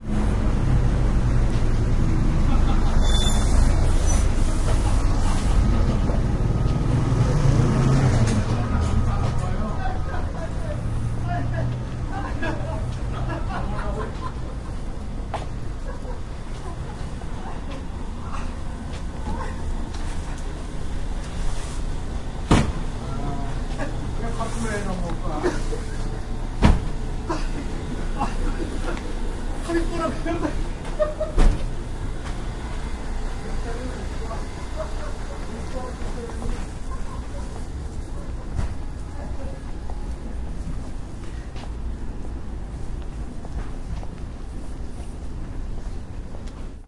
0099 Traffic and laugh
Traffic and man laughing engine and footsteps in the background
20120118
field-recording, footsteps, traffic